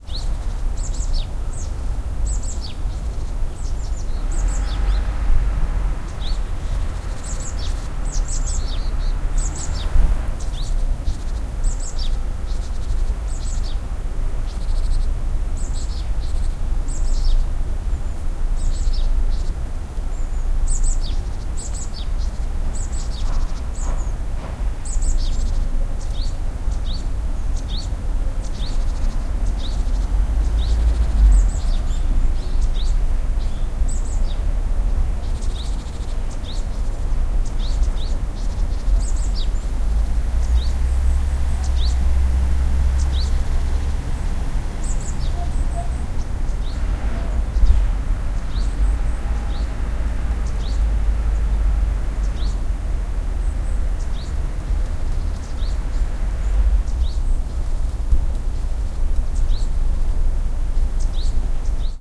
0071 Birds and truck
Birds, truck starting and traffic
20120116
birds, korea, seoul, truck